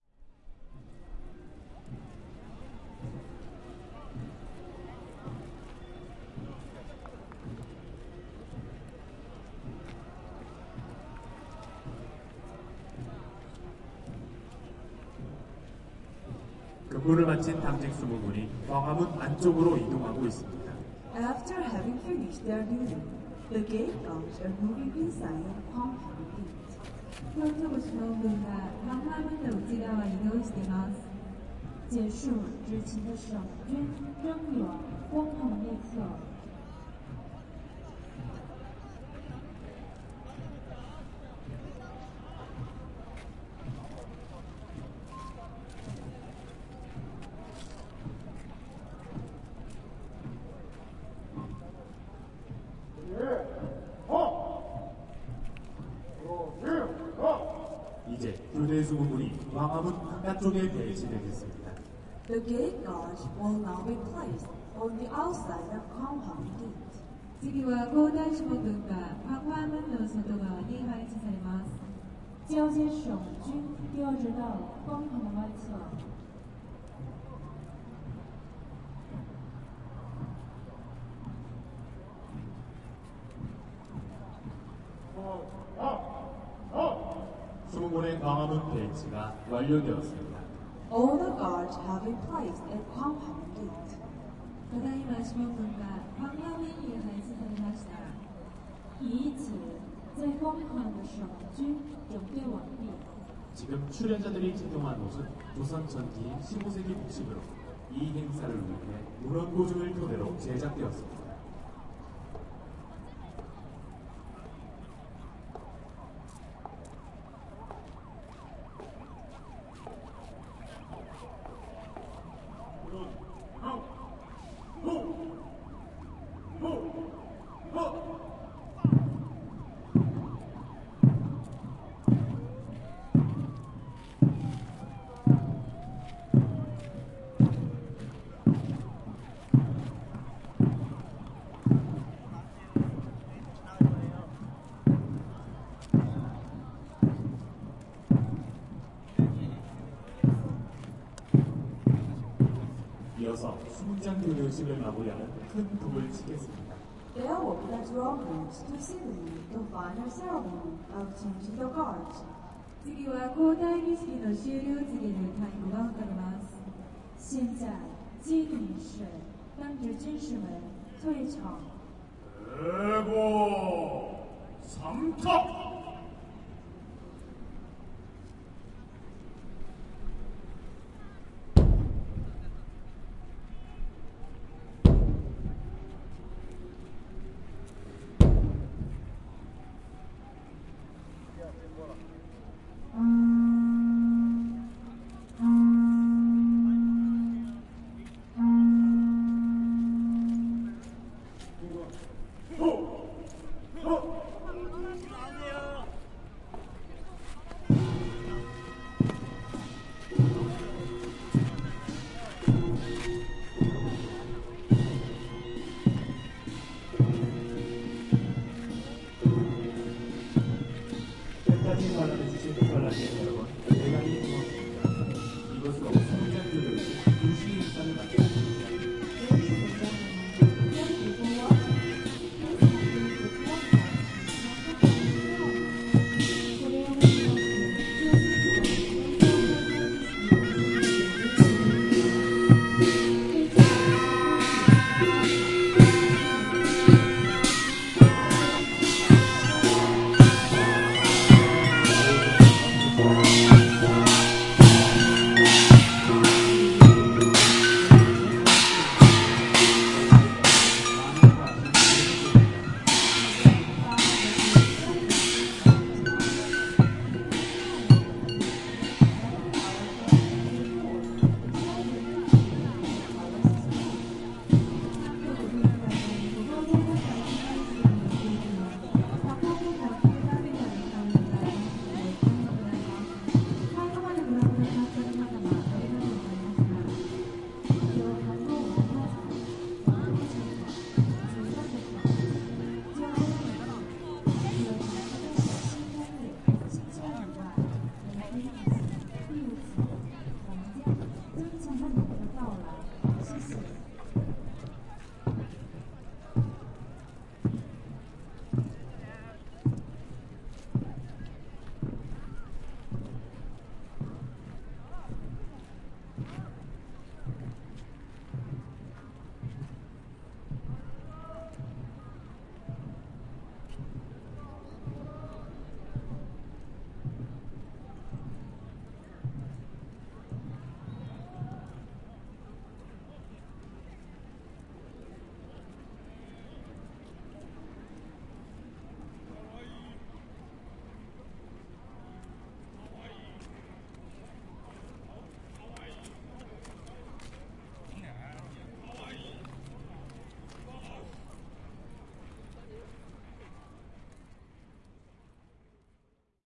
Changing of the guard at Gyeongbokgung Palace.
20120711